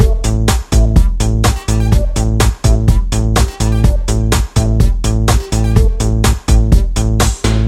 A music loop to be used in storydriven and reflective games with puzzle and philosophical elements.